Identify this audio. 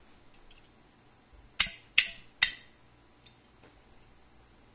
metal sharpen
this was made by rubbing two knives together.
metal, sharpening